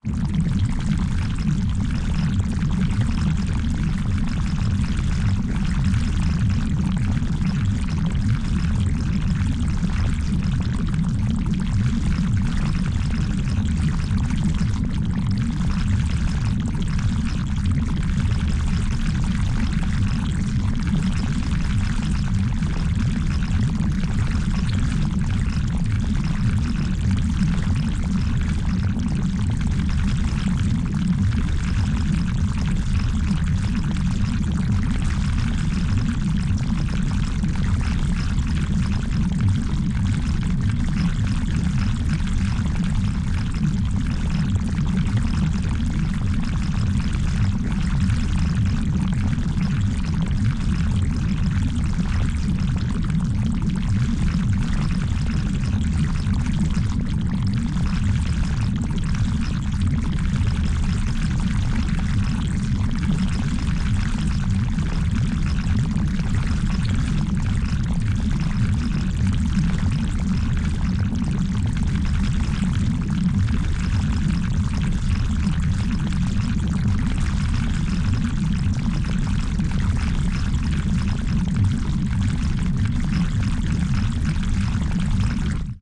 Liquid, Mud, Organic, viscous,Squishy, gloopy, low frecuency.
Channel: Stereo